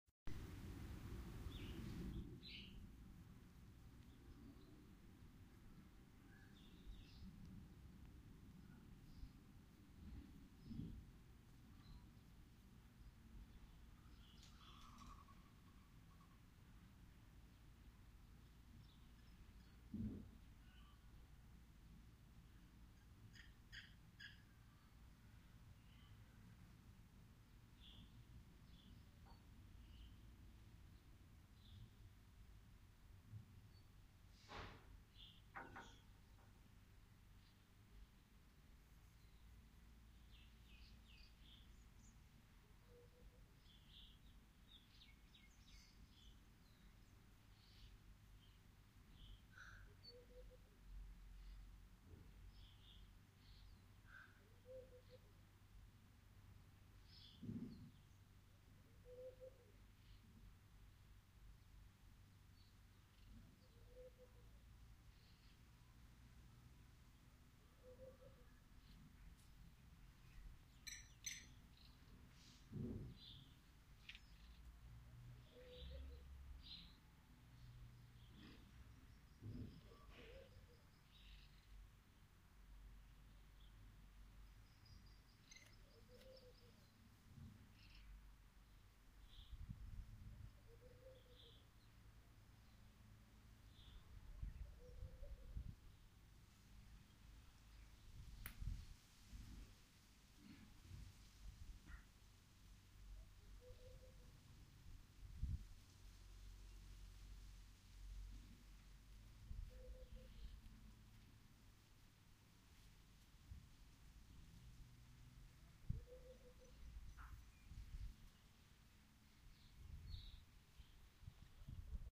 Ambiance of nature